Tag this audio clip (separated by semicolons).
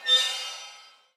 jorick; inharmonics; cymbal; creepy; horror; bronius; drumstick; inharmonic